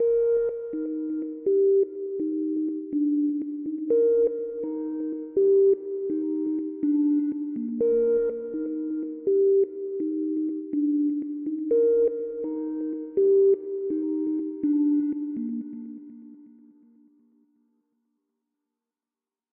Reverbed synth lead perfect for various electronic music
Made in FL Studio 12 using the plugin "Toxic Biohazard"'s default sound.
123 BPM